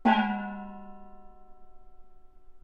Recording of a single stroke played on the instrument Daluo, a gong used in Beijing Opera percussion ensembles. Played by Ying Wan of the London Jing Kun Opera Association. Recorded by Mi Tian at the Centre for Digital Music, Queen Mary University of London, UK in September 2013 using an AKG C414 microphone under studio conditions. This example is a part of the "daluo" class of the training dataset used in [1].
beijing-opera, china, chinese, chinese-traditional, compmusic, daluo-instrument, gong, icassp2014-dataset, idiophone, peking-opera, percussion, qmul